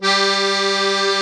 real master accordeon
master, accordeon, instrument